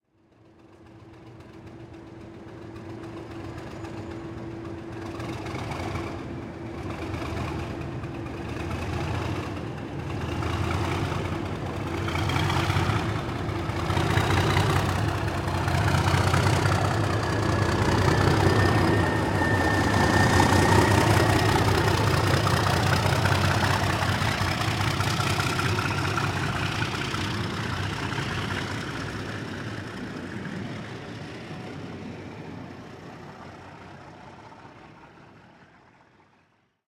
Old Diesel Train Departure
BR Class 37 departing Preston train station
Class-37, Departure, Locomotive